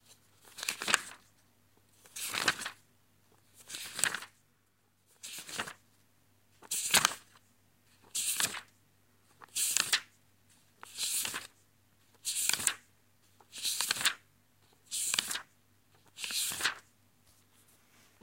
Flipping through a book
Book flipping. Reading a book.
book, paper, library, reading, booklet, words, page, pages, Flipping, papers